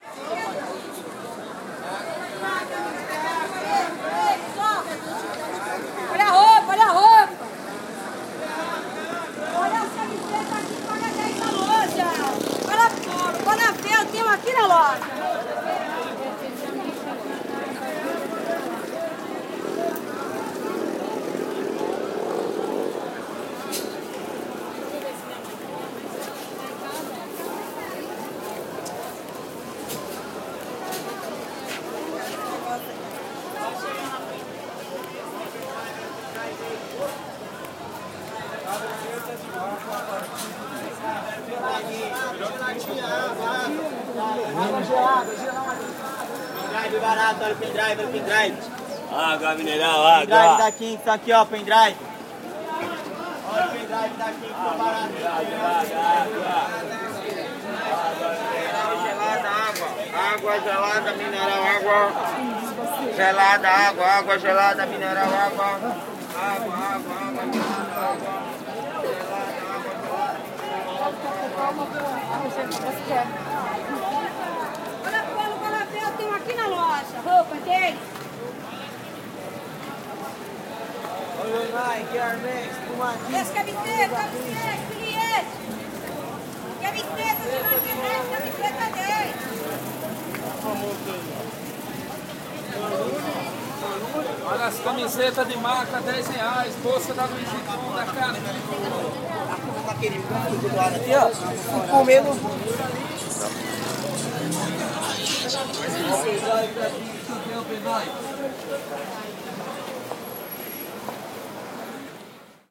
Field recording of a large marketplace in Sao Paulo, Brazil.